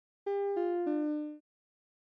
incline 4down
tones frequency notification
tones, frequency